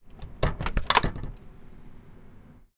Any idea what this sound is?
Door, front, closing
A recording of a front door being closed.
ambient, Door, general-recording, foley, closing